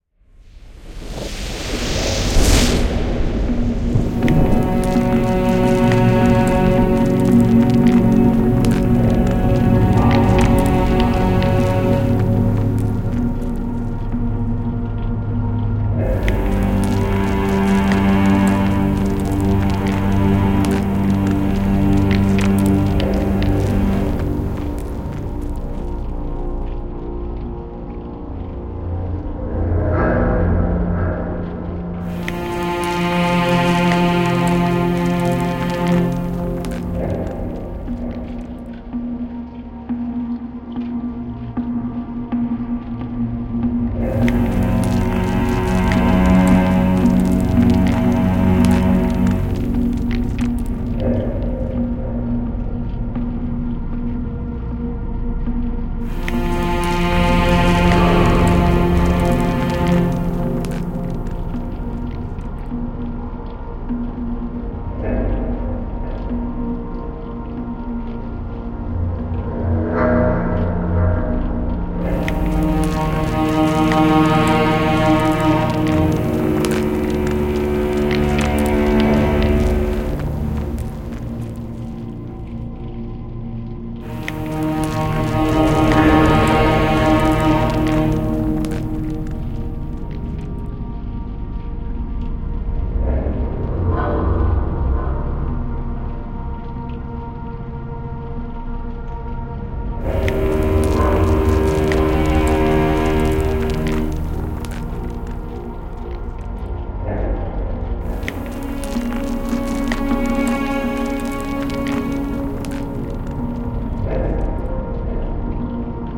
Dark Myst Crime Detective Poirot Scherlock Scary Sad Mood Atmo Amb Soundscape Cinematic Surround